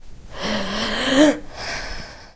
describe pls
Sound of very heavy breath,like someone drowning, recorded with a tape recorder at the University Pompeu Fabra
breath, campus-upf, suffocate, UPF-CS14